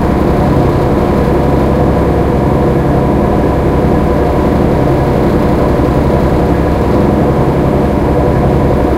ferry boat engine loop
loopable filtered noise of the engine of a ferry boat in the port of Genova